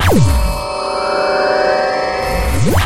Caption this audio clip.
Jelly Beam
A laser beam sound with a nice turn on and turn off. Created for "Jellypocalypse"
beam, fi, jelly, laser, lazer, sci, shoot, tzzzz